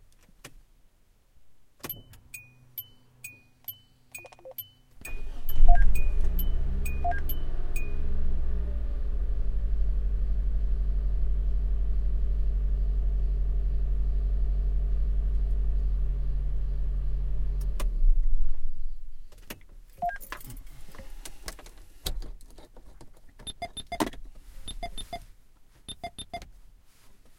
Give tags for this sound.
car; engine; foley; h1; starting; zoom

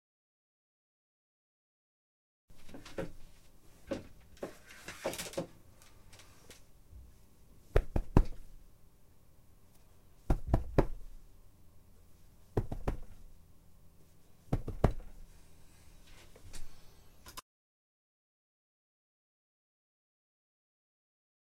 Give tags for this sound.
cushion; a; patting

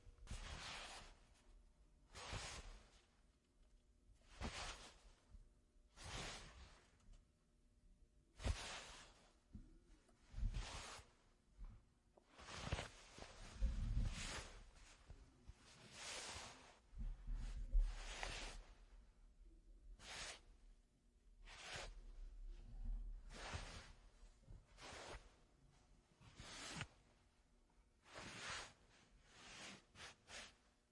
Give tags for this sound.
foley; film; cloth

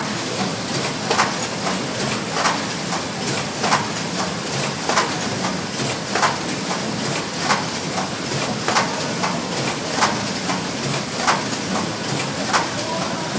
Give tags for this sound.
industrial; machine; metal; movement; noise; rhythmic

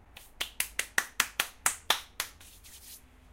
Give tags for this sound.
making; dust

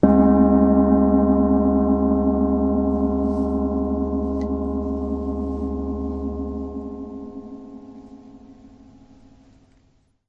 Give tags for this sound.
percussion; tam-tam

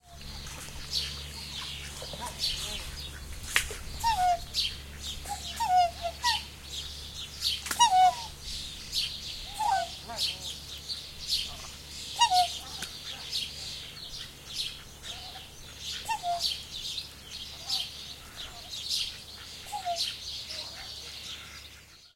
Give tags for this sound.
swan; birdsong

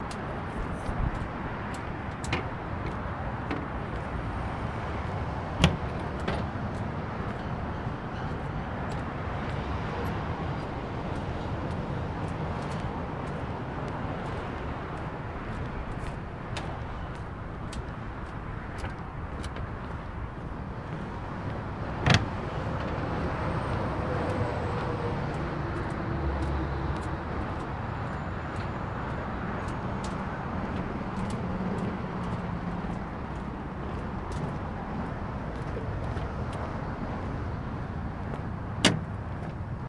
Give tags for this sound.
city; eastbank; footsteps; oregon; pdx; portland; sound; sounds; soundscape; walking

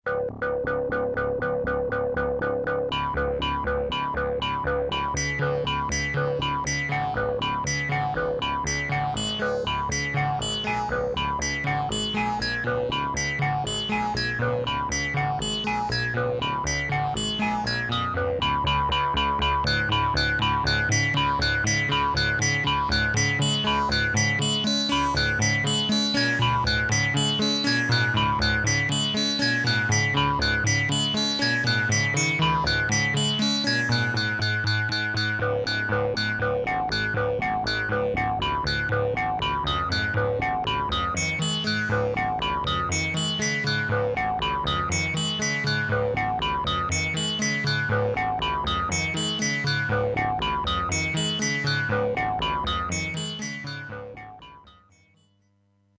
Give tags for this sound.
ambient; Blofeld; drone; eerie; evolving; experimental; pad; soundscape; space; wave; waves

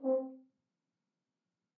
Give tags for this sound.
c4; f-horn; midi-note-60; vsco-2

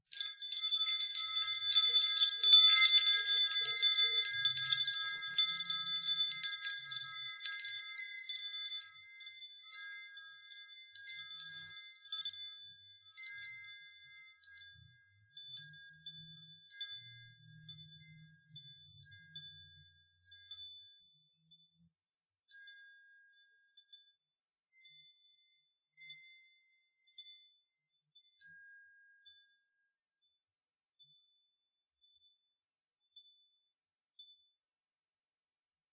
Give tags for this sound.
ding; ring; chime

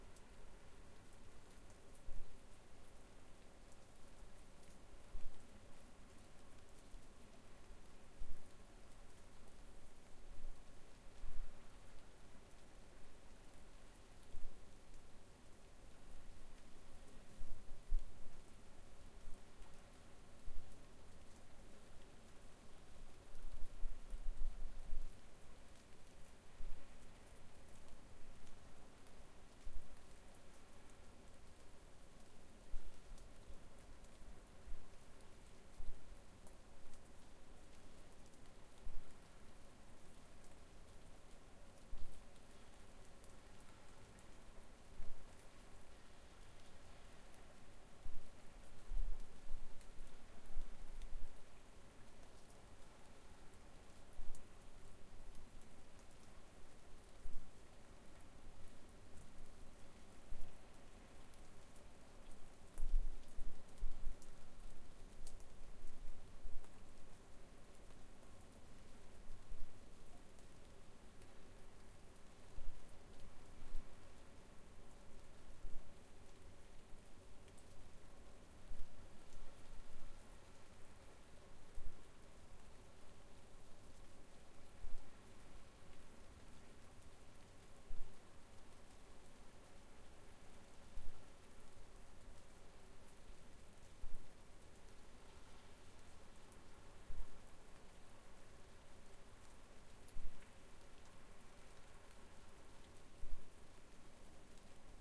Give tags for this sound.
drizzle; summer; rain; maine; ocean

